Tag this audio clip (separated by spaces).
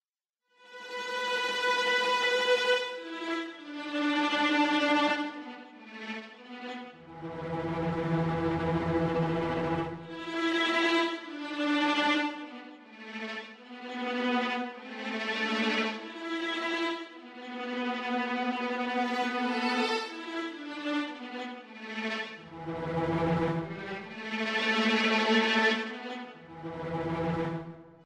processed strings tremolo